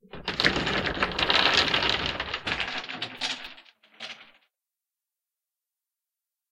A short burst of falling blocks. Based upon a small pile of Jenga blocks falling on a hollow wooden floor.
block, falling, 252basics, bricks, pile, brick, collapsing, blocks